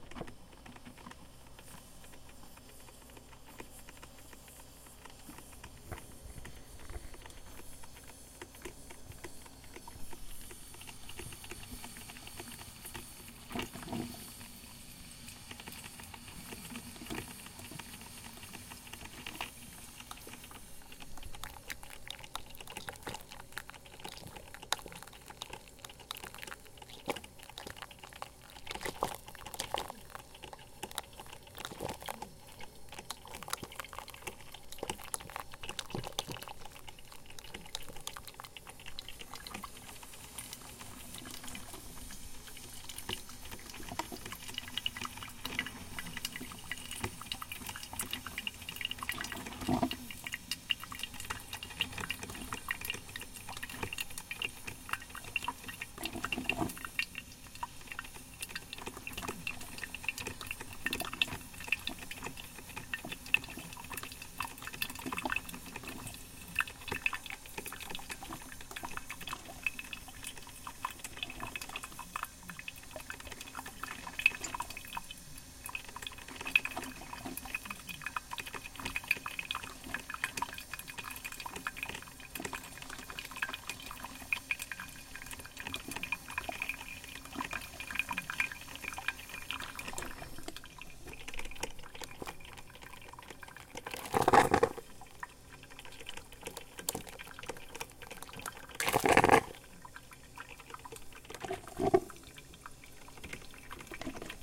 Brewing coffee, with the hiss and gurgles
coffee, brew, java